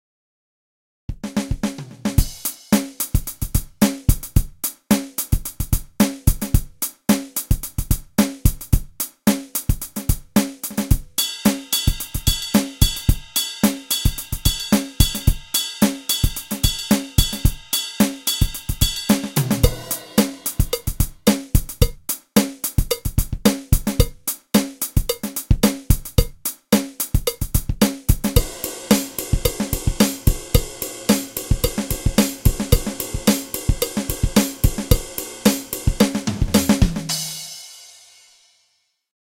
Acustic Drums simulator from Electronic drums recorded with software hydrogen.
Bateria eletronica gravada com software hydrogen
bateria, eletronic, eletronica, loop, Acustic, drums, drum-loop